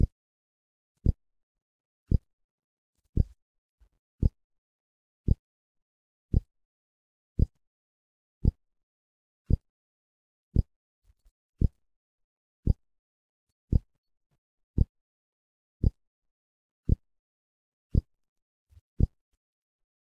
A strange pulse with 1.06 second interval. Accidentally got this pulse when tried to record music from my phone to my PC using a cable.